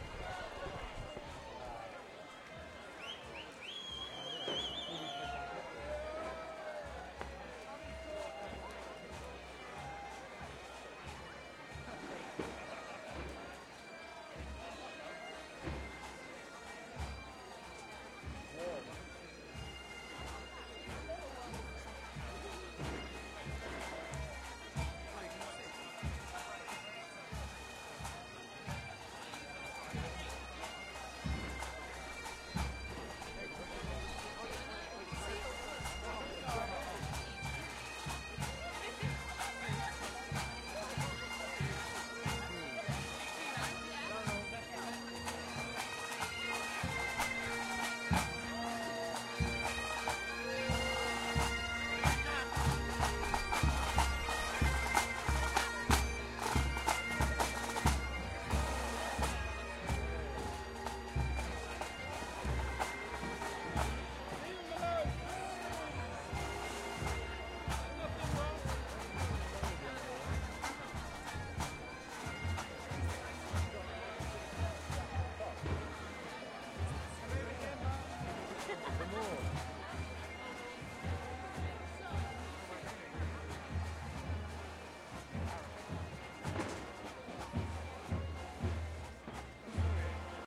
lewes1 Bagpipe band
Lewes bonfire night parade, England. Crowds of people dress in historic costumes and burn effigies of the pope and political leaders. Lots of bangs, fireworks going off, chanting, shouting.
bangs, bonfire, crowd, fireworks, lewes, march, noisy, people